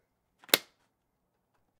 Opening a Binder
Binder, Click, Opening